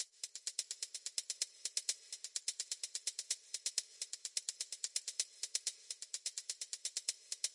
hats extra
Some extra hats
Reason 9
additional, hat, hats, hi, loop, reverb, techno